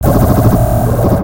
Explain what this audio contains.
sound for glitch effects
digital, artifact, glitch